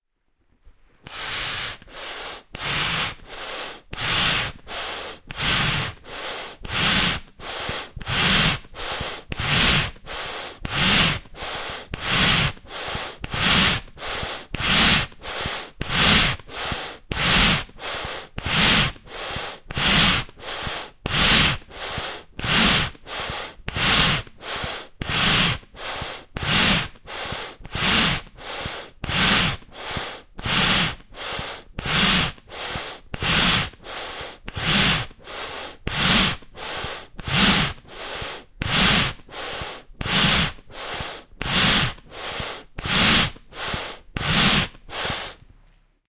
A stereo recording of bellows being pumped. Rode NT4 > FEL battery pre-amp > Zoom H2 line in.
bellows, hiss, air-pump, valves, pump, stereo, xy, air